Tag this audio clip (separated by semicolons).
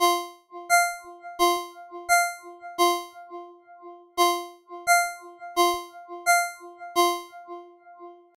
alarm; alert; alerts; cell; mills; mojo; mojomills; ring; ring-tone; ringtone